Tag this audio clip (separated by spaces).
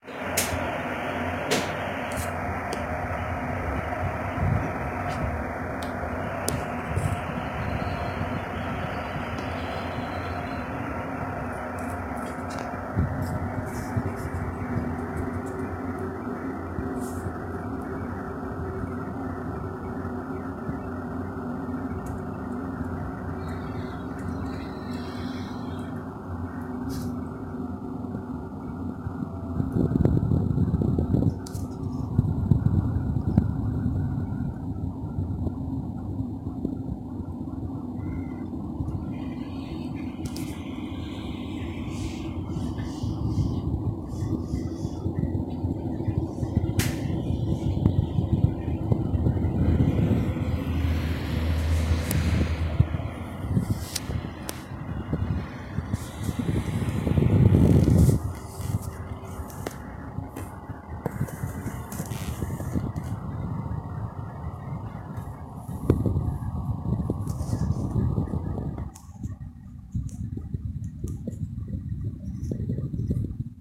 boiling water kitchen kettle